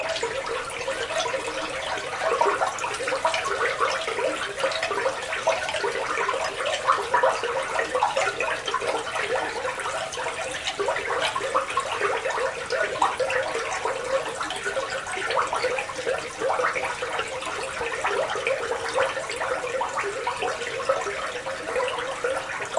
Water in Sewer 5
This is a light, close perspective point of view or water running through a storm drain.A little bit more babble. Location Recording with a Edirol R09 and a Sony ECS MS 907 Stereo Microphone.